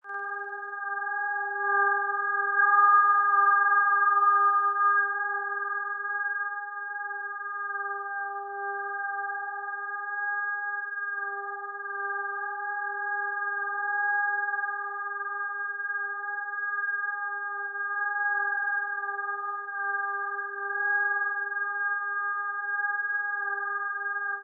This is an (electronic) atmosphere processed in SuperCollider
ambience, ambient, atmosphere, electronic, processed, supercollider